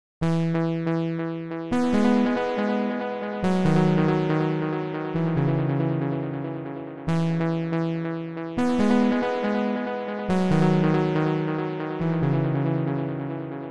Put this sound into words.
Absolute Synth
A few chords put together using an arppegiator and change in velocity.
arppegiator, delay, synth, techno, trance